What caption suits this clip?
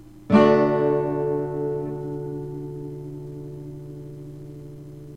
used TAB: 2320xx(eBGDAE)